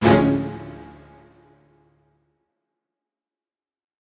A lot of effort and time goes into making these sounds.
A string hit you might hear during a dramatic moment in a movie, show or video game. Or a radio play? Or a podcast? YOU DECIDE!
Produced with Garageband.